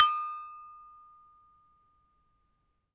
Sample pack of an Indonesian toy gamelan metallophone recorded with Zoom H1.
metallic, metal, hit, metallophone, percussion, percussive, gamelan